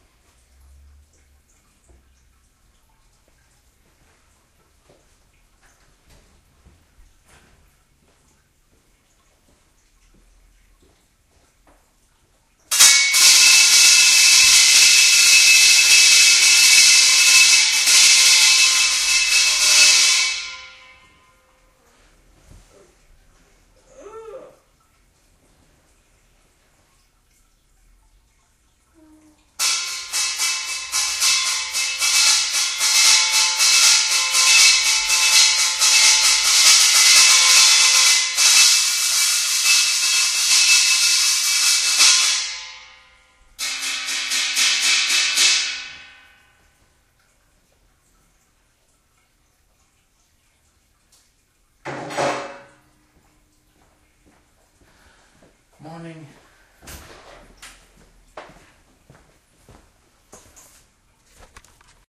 Someone was supposed to get up and did not. This is what happens in this house when that occurs.
noise, joke, banging